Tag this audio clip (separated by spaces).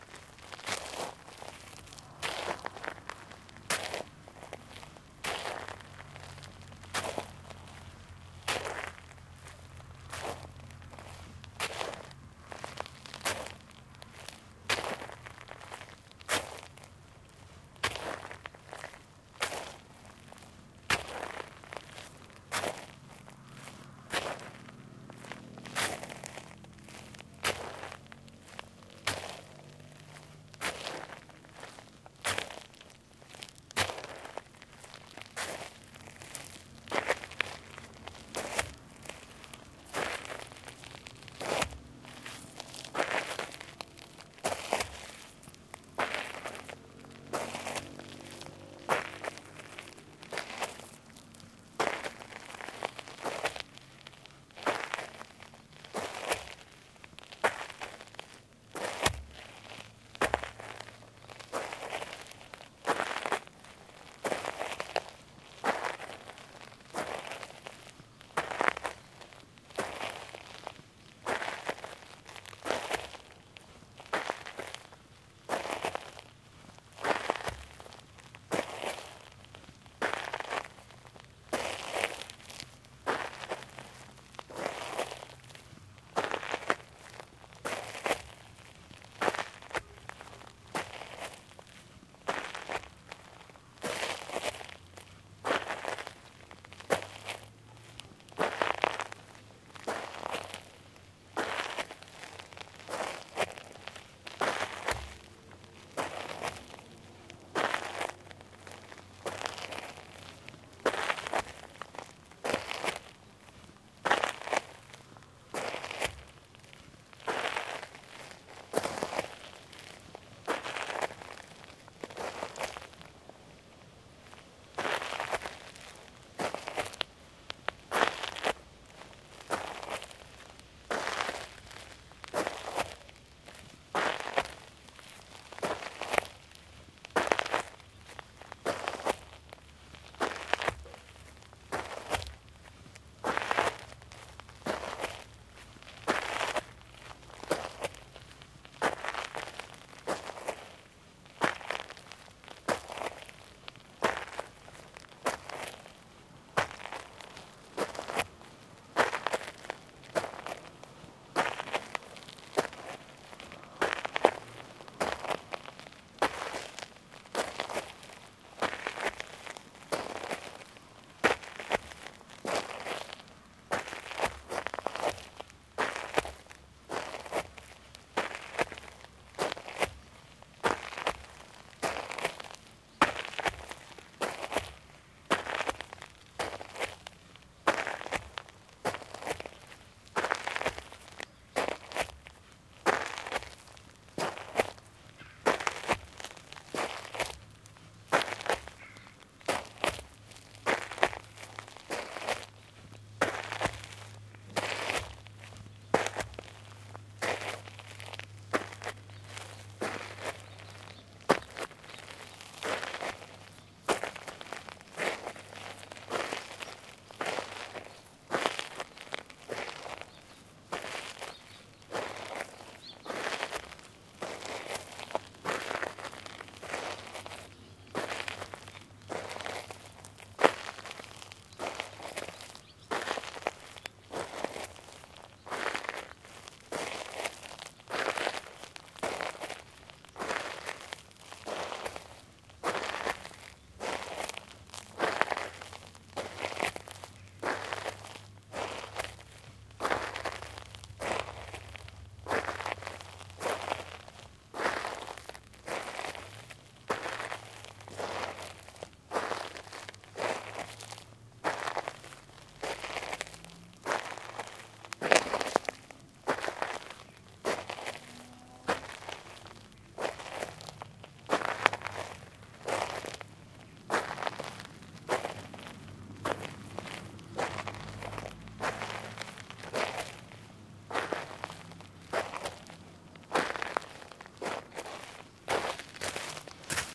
going gravel grit Steps stones walk walking